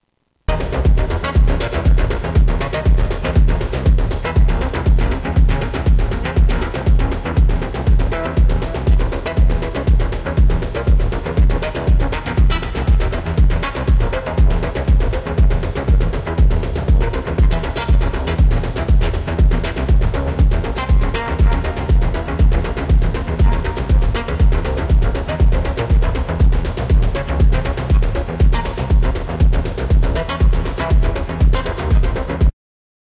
Distorted Tape techno
Old file recorded in VST Host, i have probably 1 hour long one, in my head it is a banger but...well..it is not
tape, oldschool, distorted